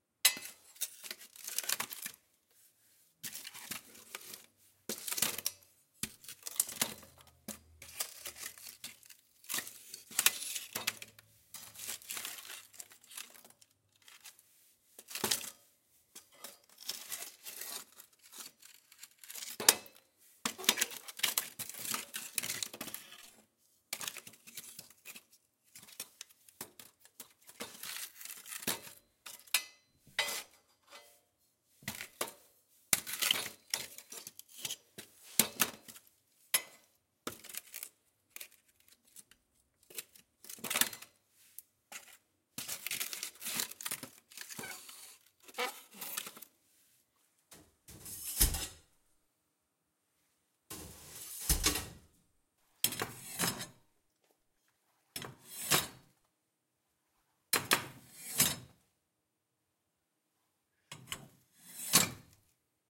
Fire Iron, Poking Wood, Fireplace, Indoors, Clanging metal, Pulling from Bucket
Firepoker use sounds: poking some burnt logs (no fire), pulling poker out of fireplace tool bucket and gate, hitting other metal with poker
poker, midside